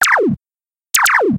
Laser gun
Single and double shots